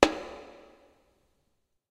HAND DRUM SMALL SINGLE HIT
drum, hand-drum, percussion, single-hit, small